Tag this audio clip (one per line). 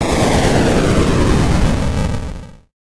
digital-noise
noise